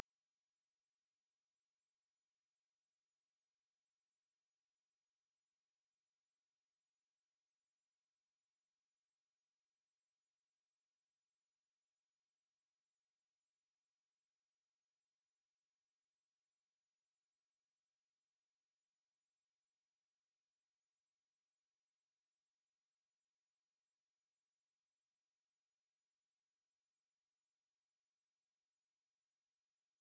A high pitch ear ringing noise I generated for a project.
high-pitch, Noise